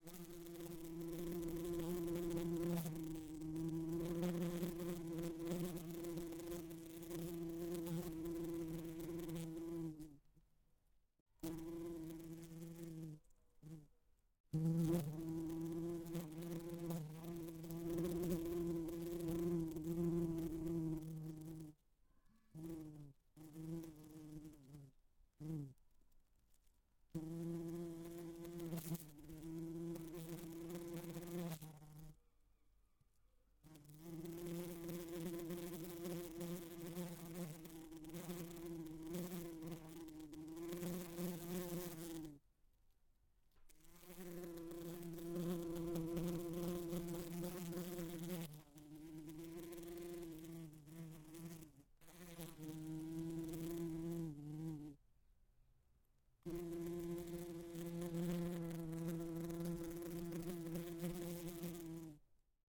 Bee buzzes against window with agitation
bee, bumble, creature, buzz, wasp, animal, fly